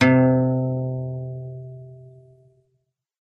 Single note played on an acoustic guitar from bottom E to the next octave E